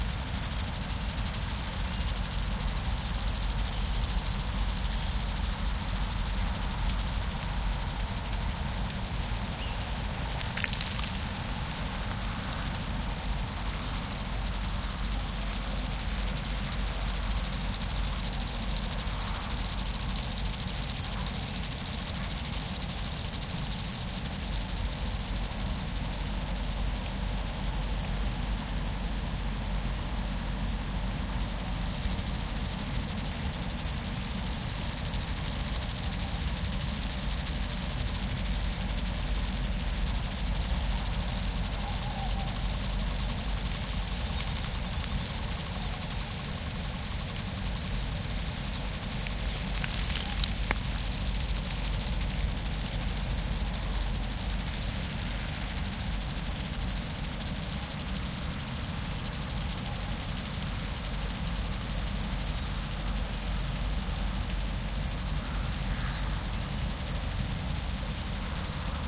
This is the recording field watering sprinklers.
Recorded with Sound Devices 302 + 2x Primo EM172 Omnidirectional mics.
field, field-recording, sprinkler, water, watering